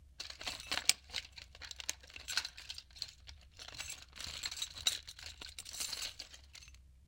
Looking for tools in a tool box